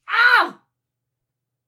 female shout 01
female shouting sound effect
shouting, shout, female